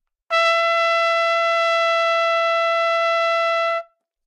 overall quality of single note - trumpet - E5
Part of the Good-sounds dataset of monophonic instrumental sounds.
instrument::trumpet
note::E
octave::5
midi note::64
tuning reference::440
good-sounds-id::1026
dynamic_level::mf
E5, good-sounds, multisample, neumann-U87, single-note, trumpet